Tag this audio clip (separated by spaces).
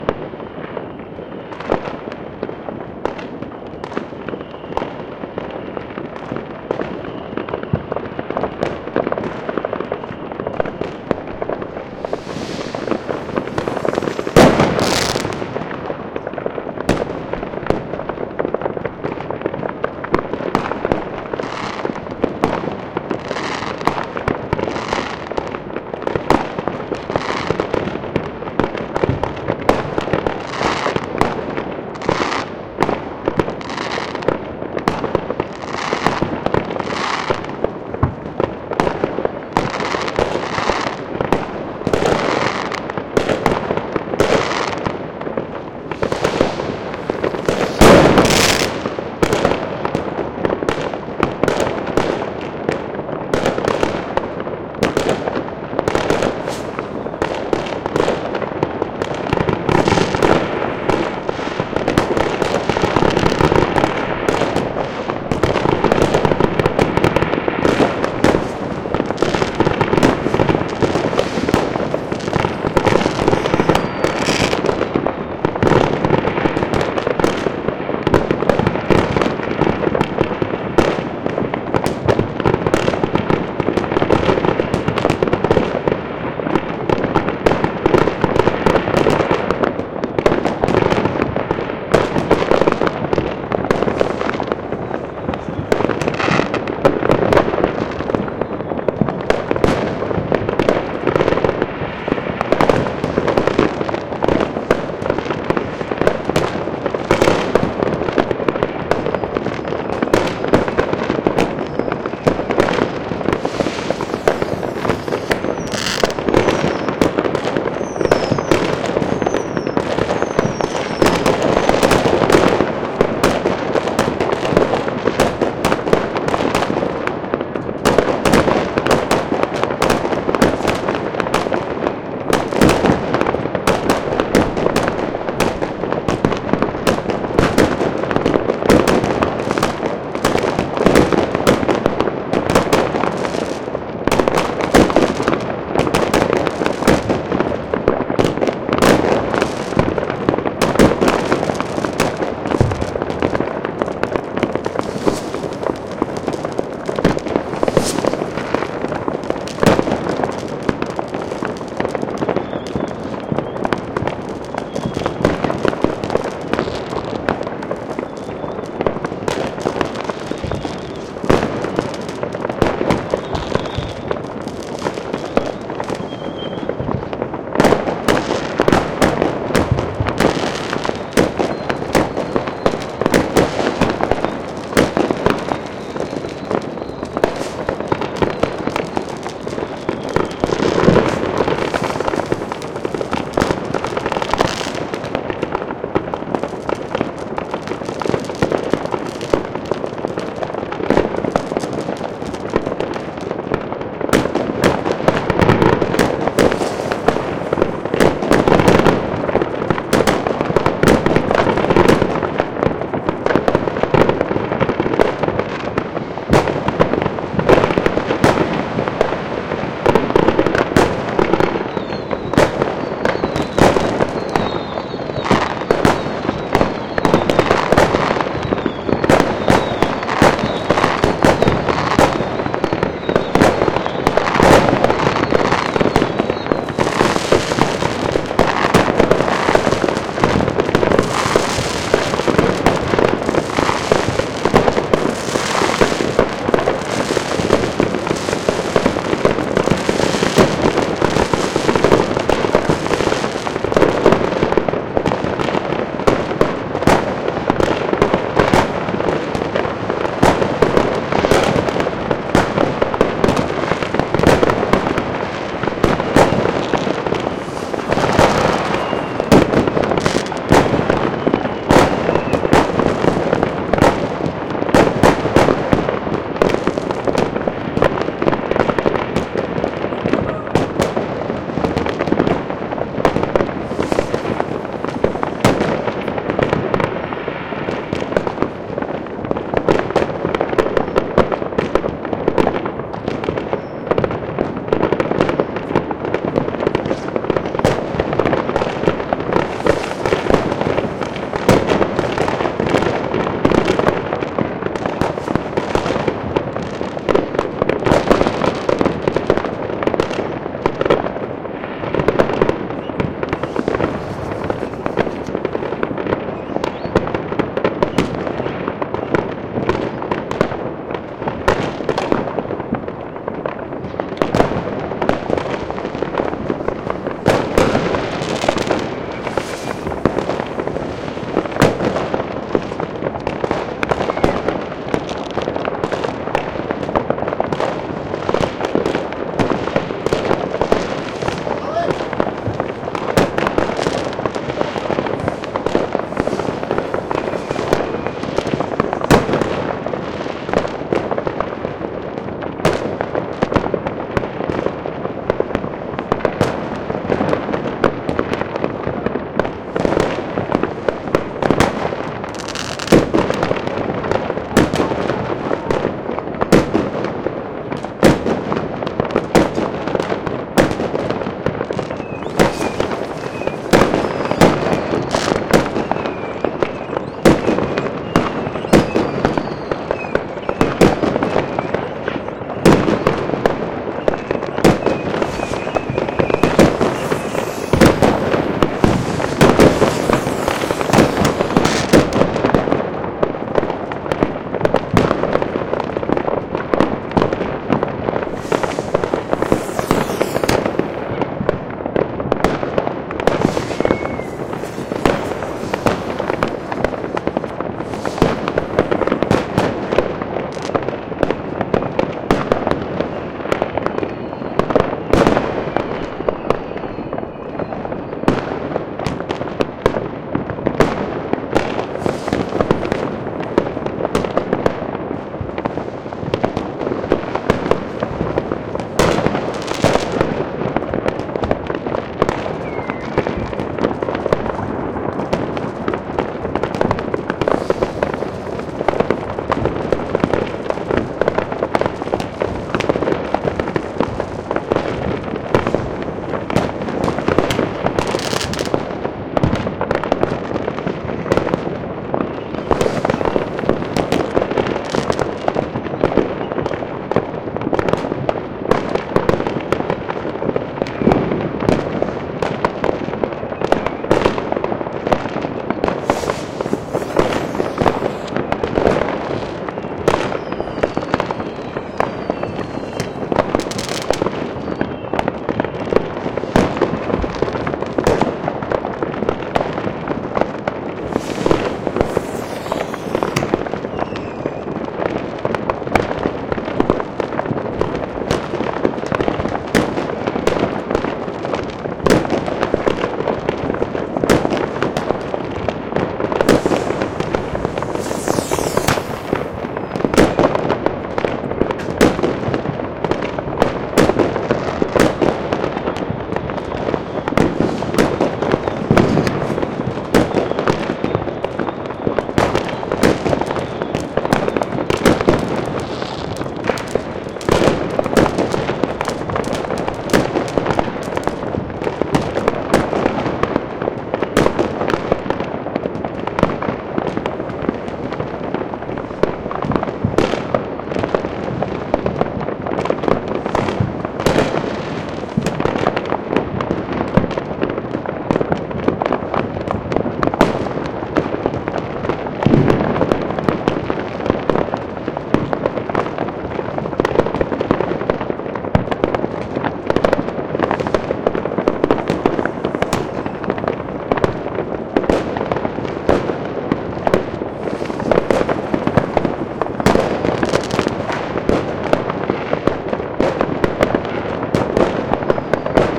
gun sfx bombardment fireworks New-Year loud saluting